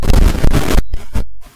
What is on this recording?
glitch and static type sounds from either moving the microphone roughly or some program ticking off my audacity